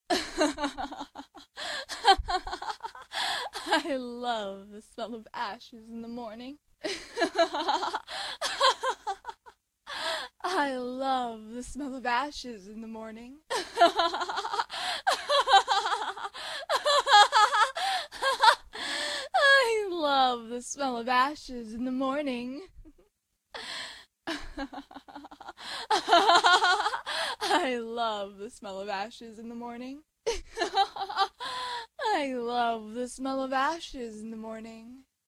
laughing/evil: I love the smell of ashes in the morning
laughing, love, ashes, voice, sample, I, smell, evil, morning, female